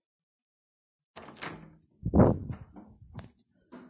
Puerta abriendose
open a door sound